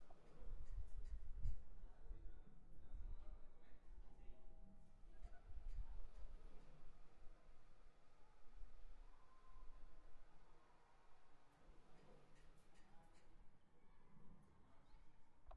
Uni Folie Elevator
The sound of a lift.
bing, Elevator